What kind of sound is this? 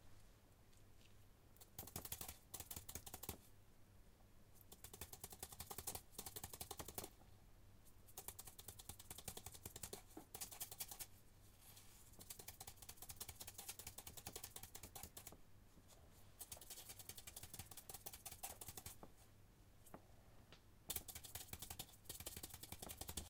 SFX insect/little animals running
Sound effect that can be used for cartoons - not realistic. But funny :)